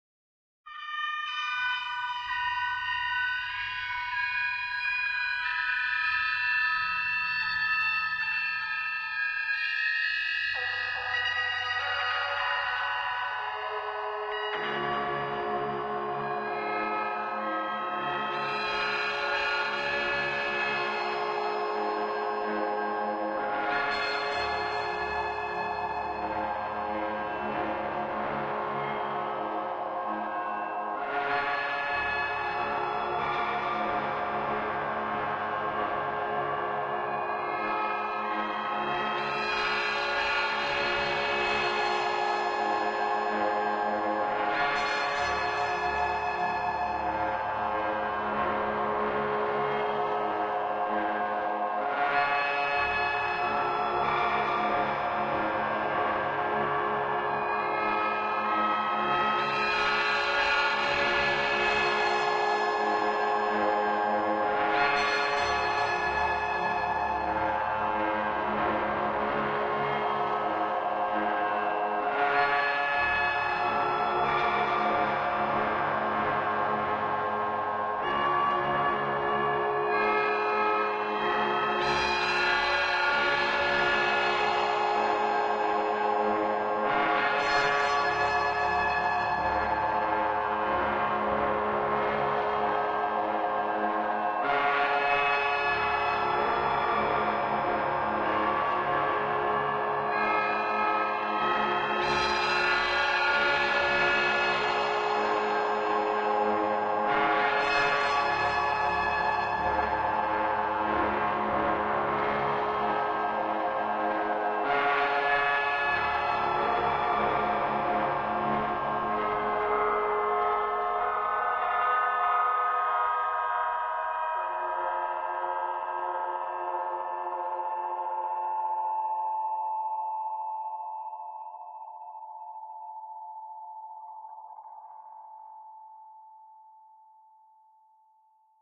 Intro or Melody for a melancholic Track ... In the full Track i planned to play some other Instruments like a bell or sweet Synth Piano ... Same Trackelement like the
Created with Absynth 5 as a combination of Pads and a Pitchbend Effect... Saturated, Compressed and Excited ...